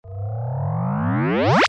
Rising Activate 04
A slow rising power up sound.
activate, power-up, rising, slow, synth, synthetic